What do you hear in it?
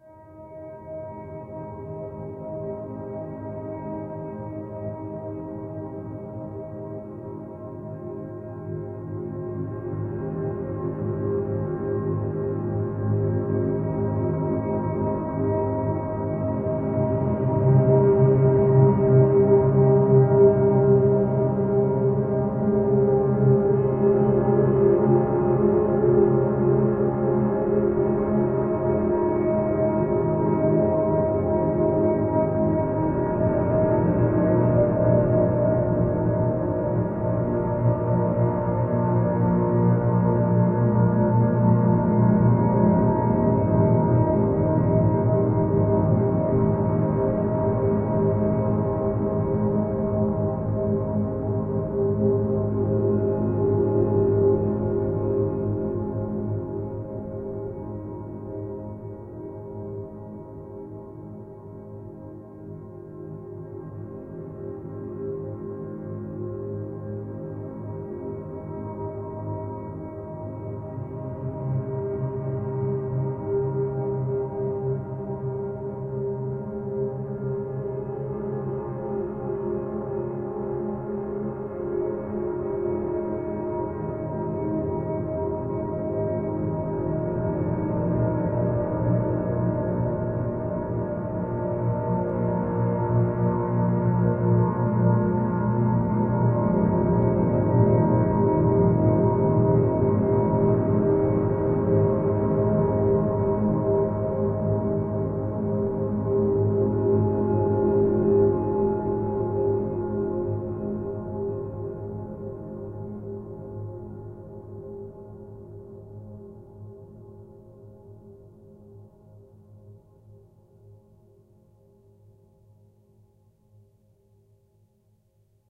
Elementary Wave 11v2
Remix of the original, has been five years since I uploaded the original so consider this a 5 year anniversary. So thanks everyone for the love/ comments and support. Appreciate all of you and hope to keep going on.
Slightly slowed down, reverbed & delayed moar, louder and looped twice.
Original.
Same version with two other sounds added.
This sound or sounds was created through the help of VST's, time shifting, parametric EQ, cutting, sampling, layering and many other methods of sound manipulation.
6, ambiance, ambient, basic, Dreamscape, echo, editing, effects, Elementary, generated, loading, loops, music, reverb, sampling, screen, shifting, sounds, synth, time, vsts, wave